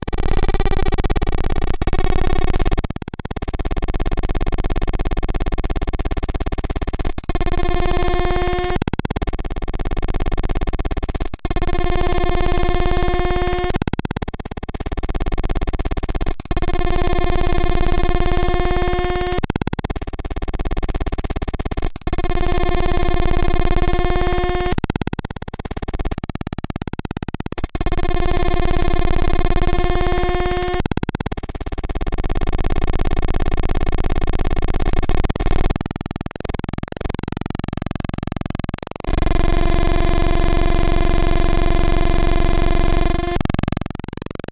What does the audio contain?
APC-Scape3
APC, Atari-Punk-Console, diy, drone, glitch, Lo-Fi, noise